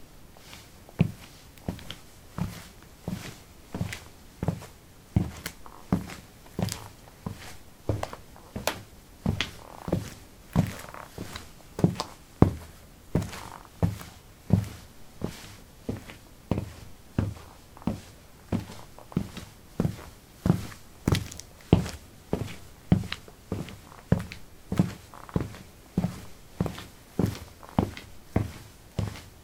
concrete 16a trekkingshoes walk
Walking on concrete: trekking shoes. Recorded with a ZOOM H2 in a basement of a house, normalized with Audacity.